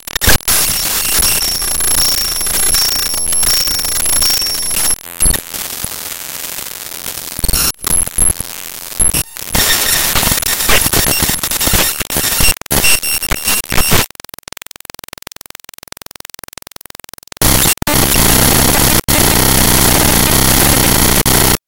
Raw import of a non-audio binary file made with Audacity in Ubuntu Studio